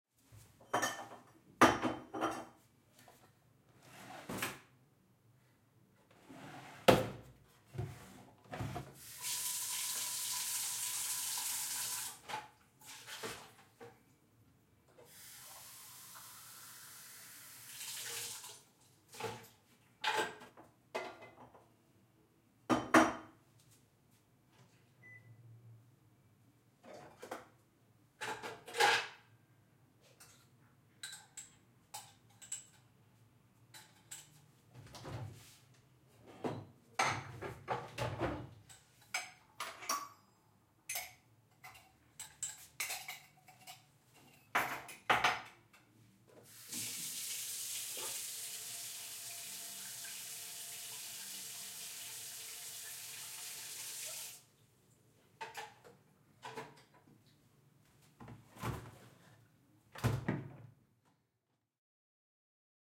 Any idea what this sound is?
Kitchen Ambience
Various kitchen noises. I recorded this while making foley for a film recently. It's intentionally roomy and captured from medium distance which was the position of the listener. There's no significant background roomtone (no traffic, fridge hum or voices), just the exagerated cutlery movements, glasses and cups clingings, water sink, cabinets, fridge door and stuff.
Device: Zoom H6
Microphones: 2 Oktava MK 012 in ORTF position
cabinet, cutlery, dishes, drain, glasses, kitchen, kitchen-activity, kitchen-movements, kitchen-noises, noises, sink, tap